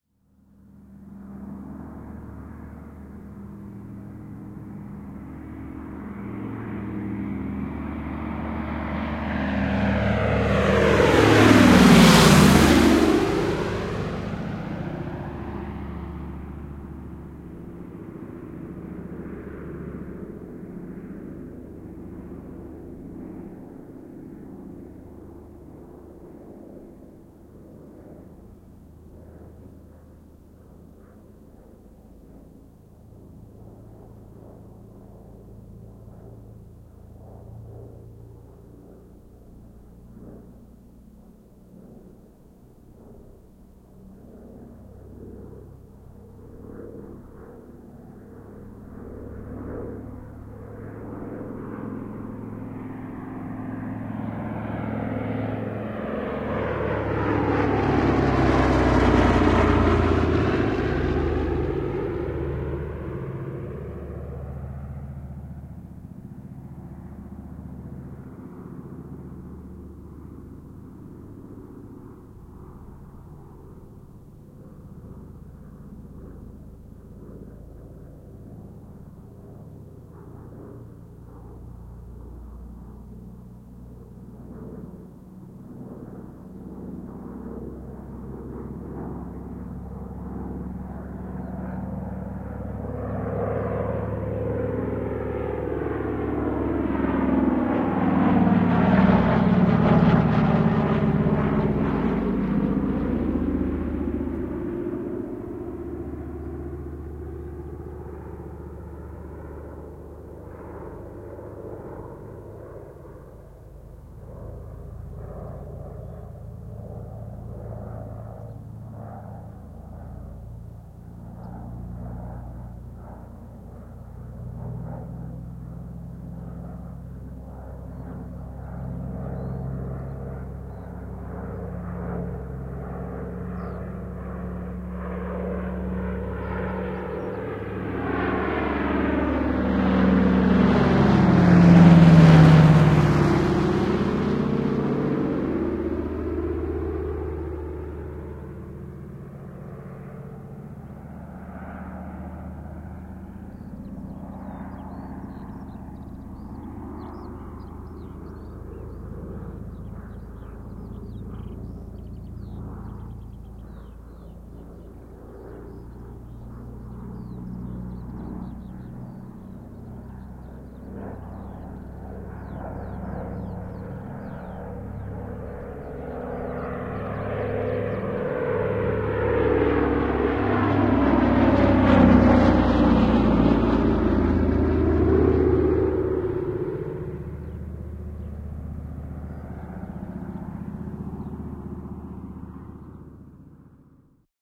Lentokone, potkurikone, ylilentoja, yli / An aeroplane, passenger plane, propeller aircfaft, DC-3, Dakota, low overflights
Matkustajakone DC-3 (Dakota). Kone lähestyy, ylilentoja matalalla.
Paikka/Place: Suomi / Finland / Kouvola, Utti
Aika/Date: 04.04.1978